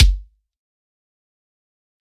Dolus Ludifico kick
drum, sample